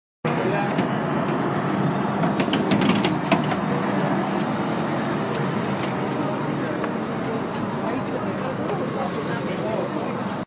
Rope Tightening Venice Water Bus Parking
Rope, Tightening, Venice